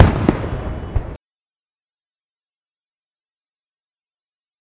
double hit
recording of a double firework explosion